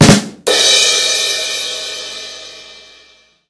a home made rim shot for comedic effect.
comic, effect, rim-shot